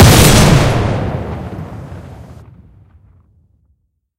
A shotgun sound with some echo
Boom, Loud, Shot, Gun, Shotgun